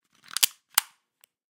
Weapons Gun Small Reload 003
Foley effect for a small pistol or gun being reloaded.
click, gun, guns, latch, mechanical, pistol, reload, weapon, weapons